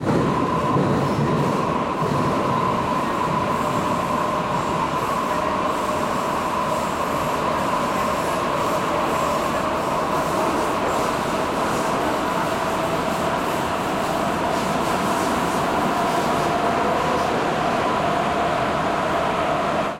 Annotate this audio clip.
The sound of metro inside of the tunnel.